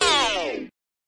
boom charang high
High-pitched charang boom made by unknown recordings/filterings/generatings in Audacity. From a few years ago.